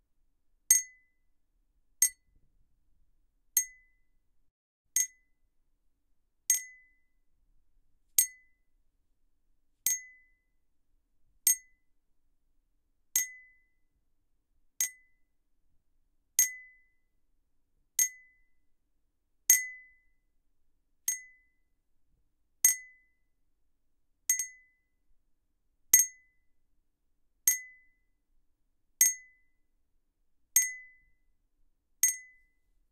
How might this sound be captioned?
Tlustá sklenice 01
Clinking of a thick glass
thick-glass, cink, tinkle, clink, n, jingle, glass